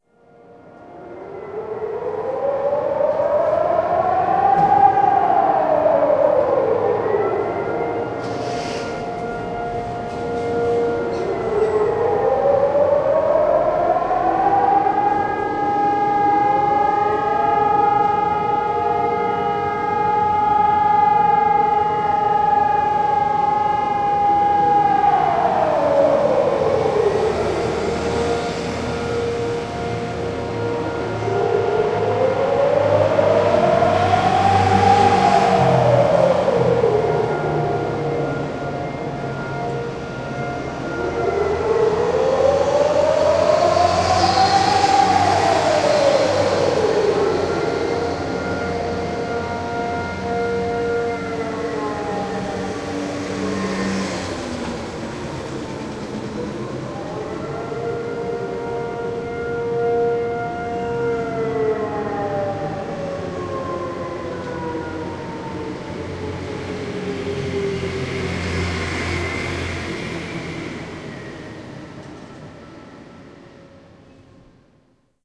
Taipei air raid sirens
Standard bi-annual Taipei air raid drill at 2:00 in the afternoon. All citizens are required to get off the streets & stay indoors until the all-clear signal sounds 30 minutes later.
field-recording, raw